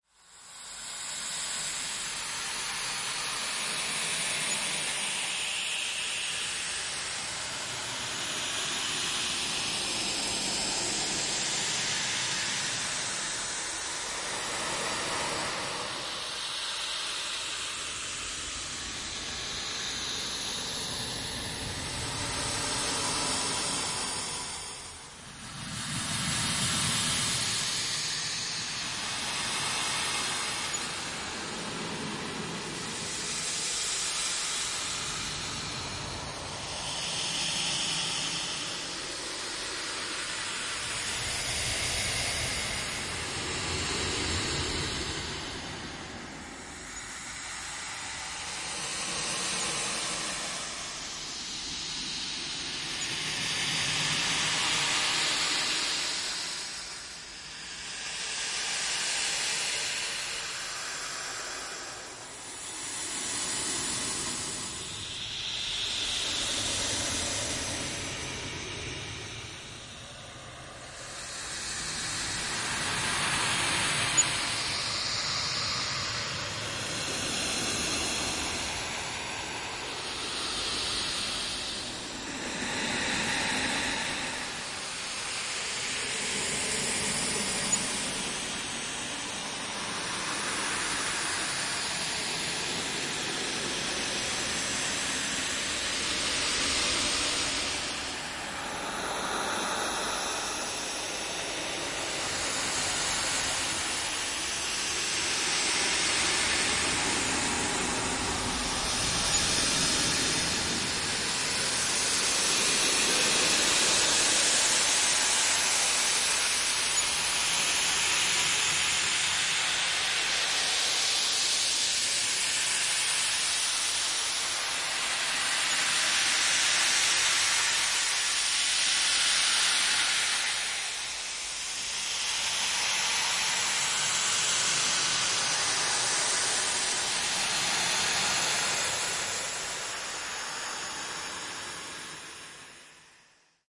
I recorded a sound and edited until it sounds like this - ambience themed electric wind or maybe a snake.